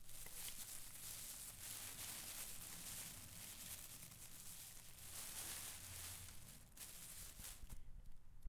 Plastic Bag Rustling